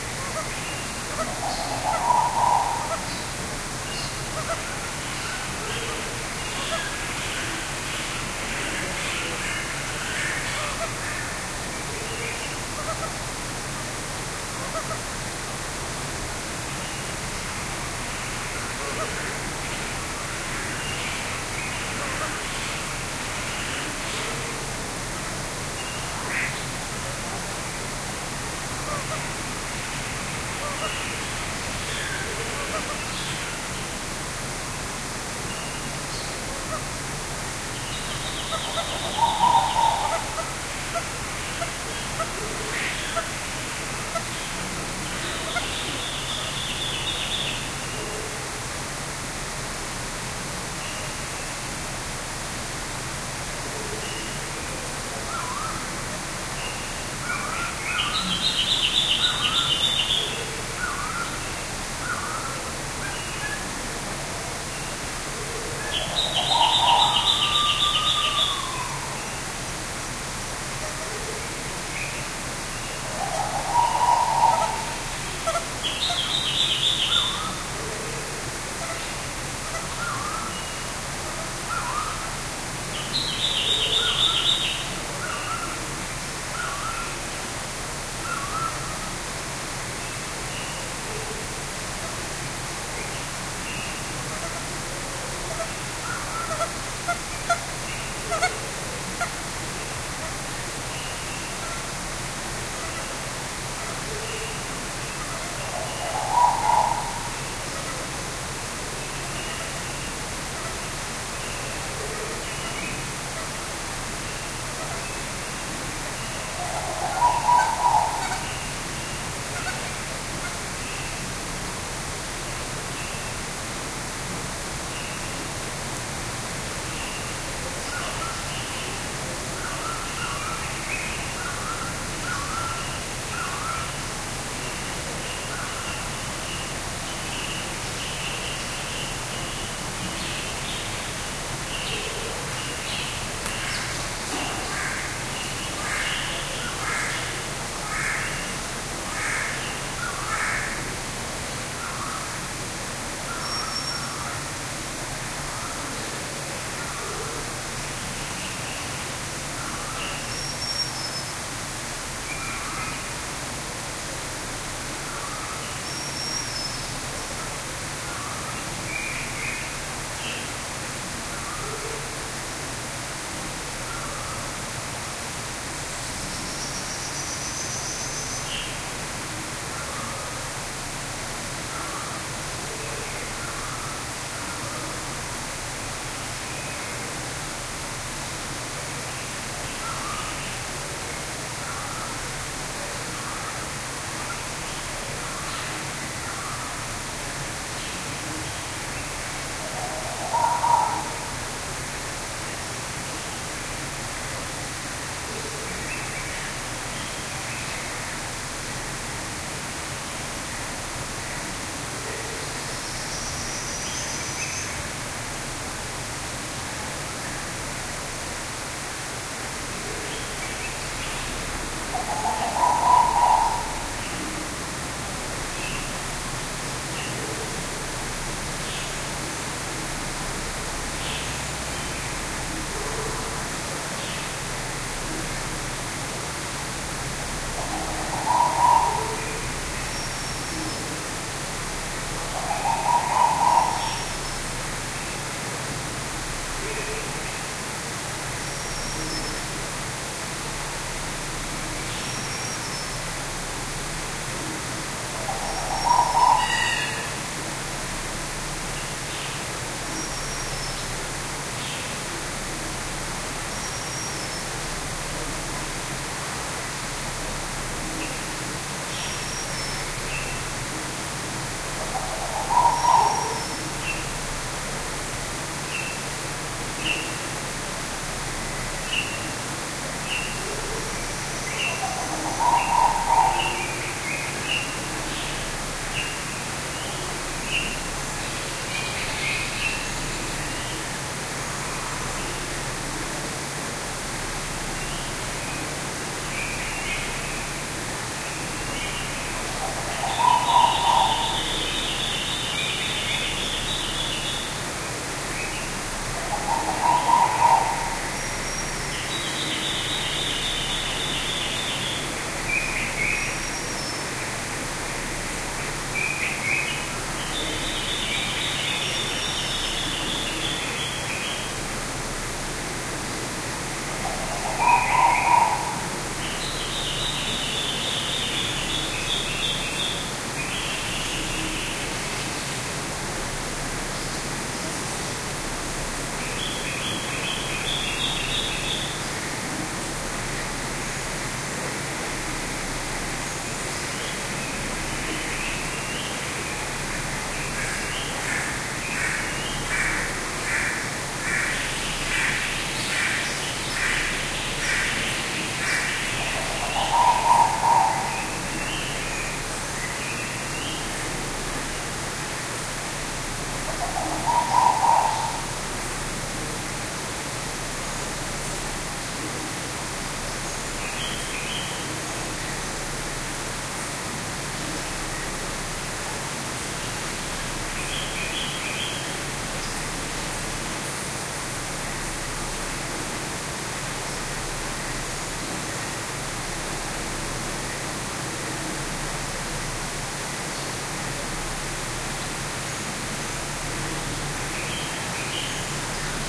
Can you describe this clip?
Recorded in the Jungle building at the Sedgwick County Zoo. This is at a different location in the building from the first recording. Birds include: Peruvian Thick-knee, Violet Turaco, Black Crake, White-bellied Go-away-bird, Collared Finchbill, Purple-throated Fruitcrow, Pheasant Pigeon, Scissor-billed Starling, Common Bulbul and Oriole Warbler. The waterfall is less noticeable in this recording. Recorded with an Edirol R-09HR.
waterfall, morning, birds, field-recording